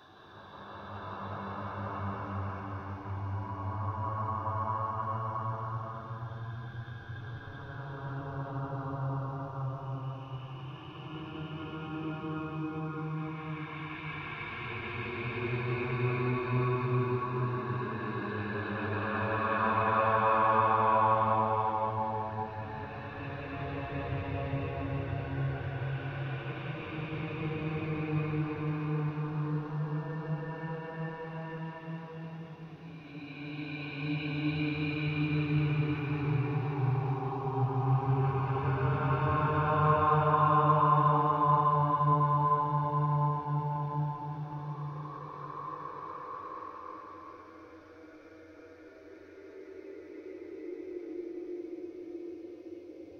Spooky Ghost Sound
Originally my voice but slowed down so I sound like a ghost
evil, ghost, haunted, horror, nightmare, phantom, spectre, spooky